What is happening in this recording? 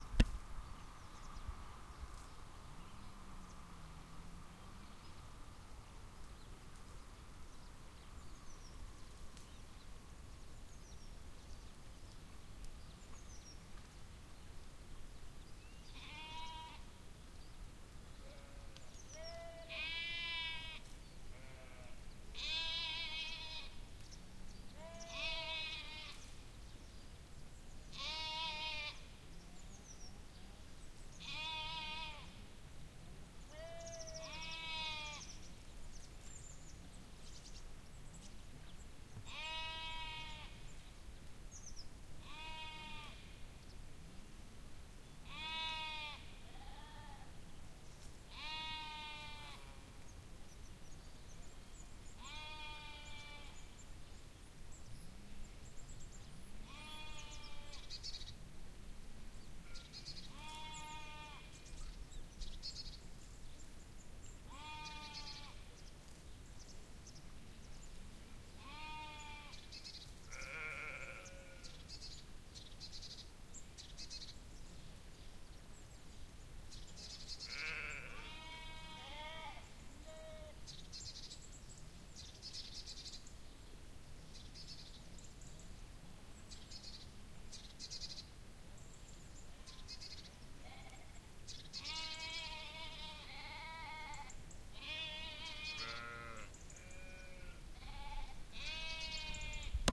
Farm in early afternoon (Sheeps, Dog, Birds...)
A farm in Portalegre, Portugal. You can hear sheeps, a dog in the distance, birds...
Recorded with a Zoom H1n.
field-recording, dog, soft, nature, cold, ambiance, bird, bleat, wind, bark, ambient, light, trees, sheeps, bleating, barking, leaves, forest, winter, birds, fie